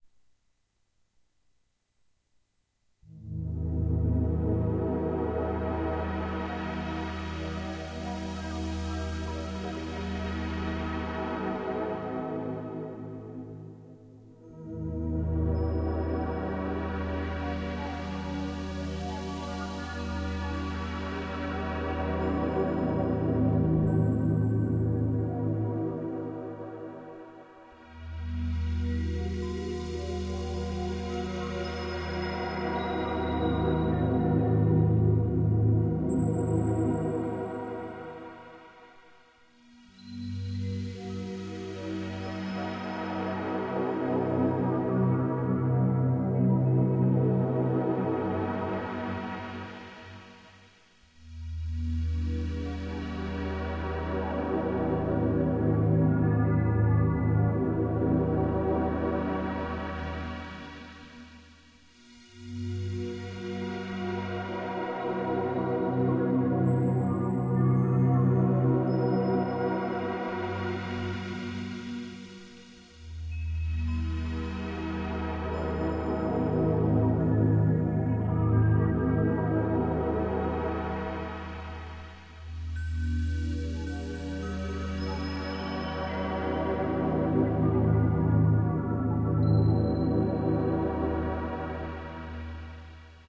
relaxation music #5
Relaxation Music for multiple purposes created by using a synthesizer and recorded with Magix studio.
music, loop, relaxation, ambience, atmosphere